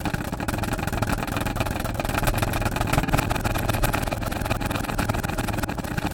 its key keyboard synthesizer spring accelerated
accelerated, key, keyboard, spring